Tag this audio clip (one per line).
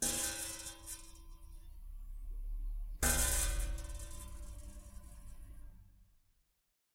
metal
iron
sheet
vibration